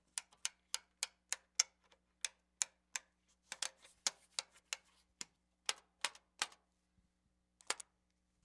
Toggle switches from an old LEADER NTSC Video Pattern and colorbar generator. Sennheiser ME66 to M Audio Delta